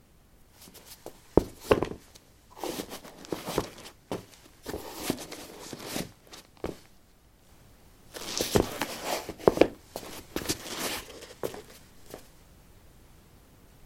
lino 15d darkshoes onoff
Putting dark shoes on/off on linoleum. Recorded with a ZOOM H2 in a basement of a house, normalized with Audacity.